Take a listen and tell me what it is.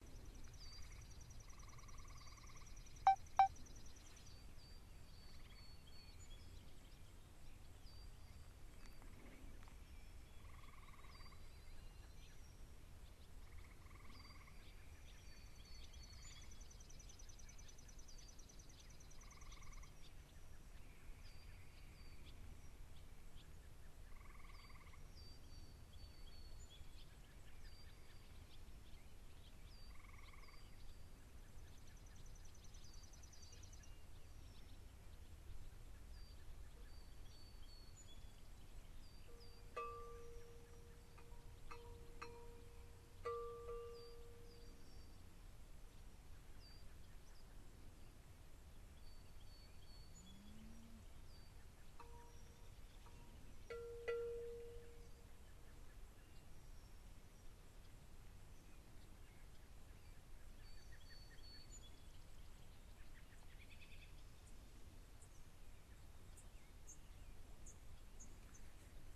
Frogs and bells
Early evening in a cow pasture in West Virginia. A few frogs are singing as the birds get ready for bed. This is not very energetic birdsong :)
Recording date: July 8, 2011, 5:45 PM.